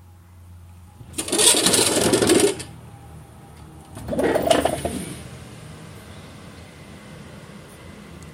open the window
The window made of alumni and it's a little heavy because of double-glazed.
Recording by MIUI HM 1W.
room, windows